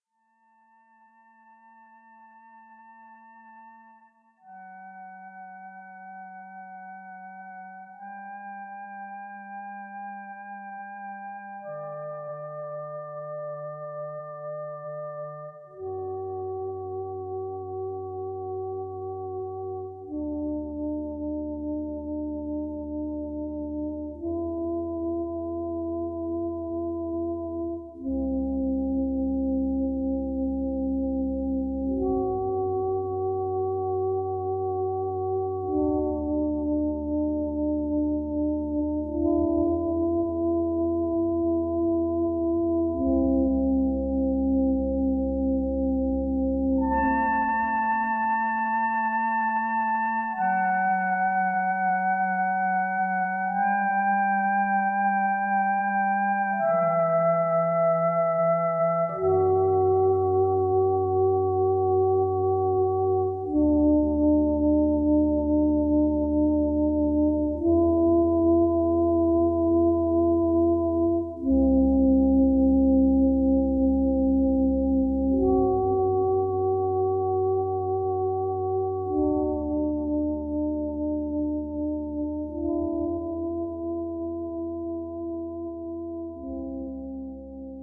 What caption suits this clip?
Create010B Pinkextreme
This Sound Was Created Using An FM7 Program Keyboard. Any Info After The Number Indicates Altered Plugin Information. Hence A Sound Starts As "Create" With A Number Such As 102-Meaning It Is Sound 102. Various Plugins Such As EE, Pink, Extreme, Or Lower. Are Code Names Used To Signify The Plugin Used To Alter The Original Sound. More That One Code Name Means More Than One Plugin.
Mood, Dark, Scifi, Ambient